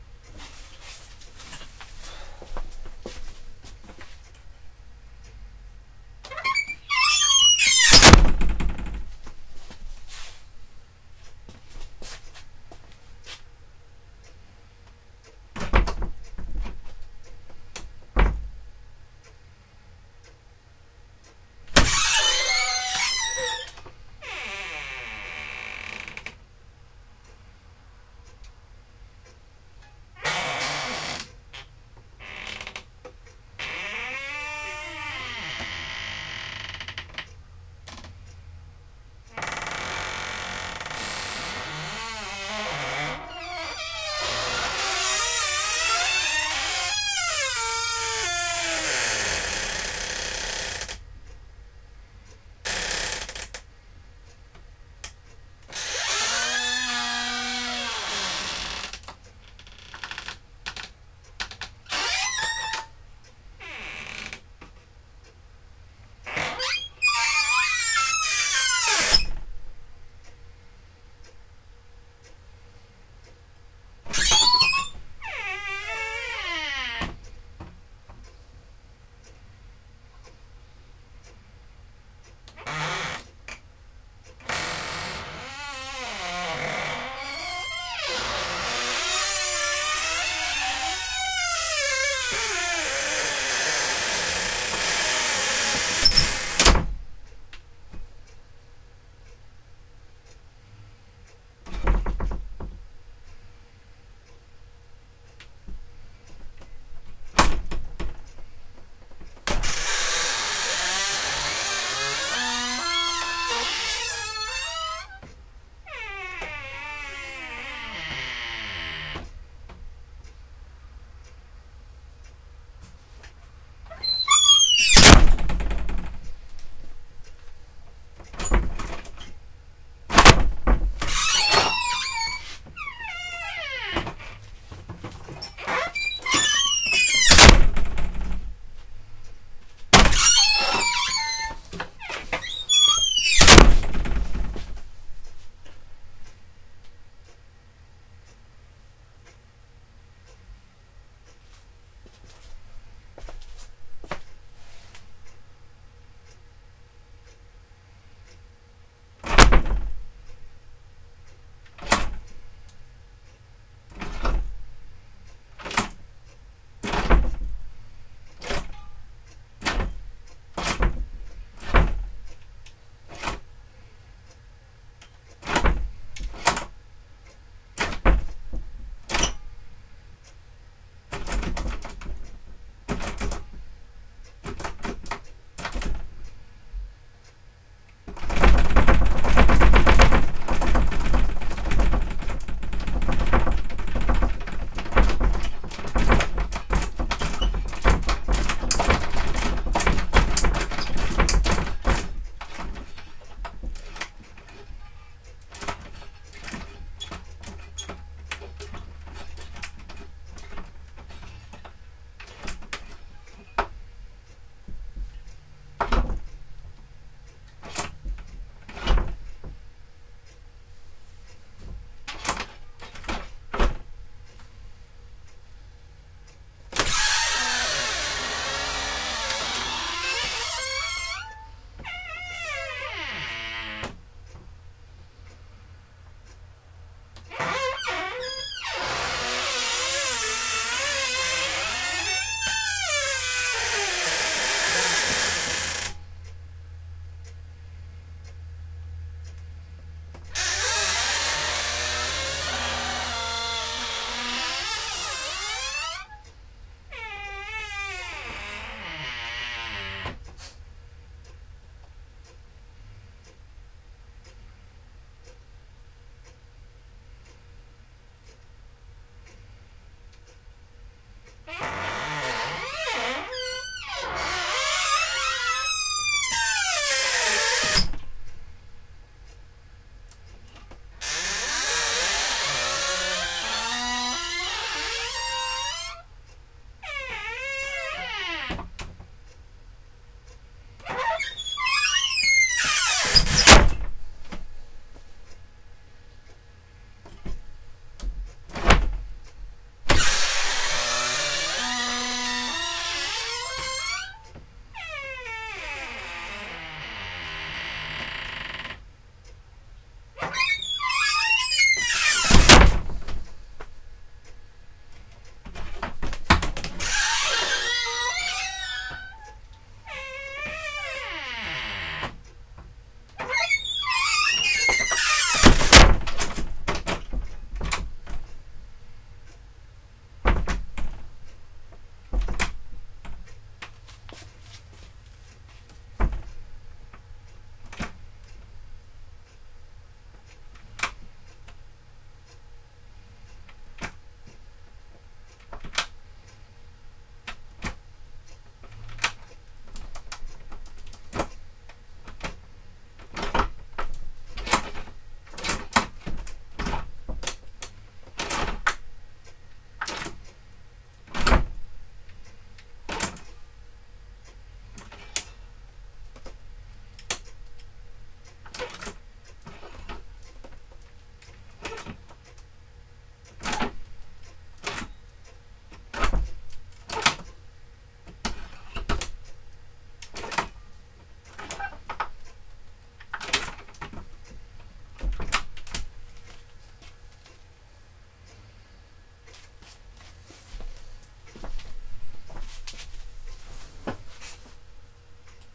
creaky wooden door and handle w clock-loud
Recordings of the epic creaking sounds from my office door (and a variety of other sounds it can make). Great effects here for classic horror ambience or just foley for an old house.
This is a old-fashioned six-panel wooden door with a metal handle (not a knob). I installed it in about 2008 or so, and have never oiled the hinge, so it's got a pretty wicked creak now in 2013. I plan to put some WD-40 on it after this, but I wanted to record it first. The door handle has also gotten pretty cranky in the last couple of months, so it makes some pretty interesting sounds, too.
This recording has the sound of my office clock ticking in the background (forgot to turn it off). And the mic gain is set pretty high -- which is great for the creaking sounds, but clips on the door closing thumps.
Includes operating and rattling the door handle, several open and close cycles at different speeds, with or without actually closing the door.
click, clock, creak, door, handle, hinge, hinges, horror, latch, lock, mechanical, rattle, thump, tick, wooden-door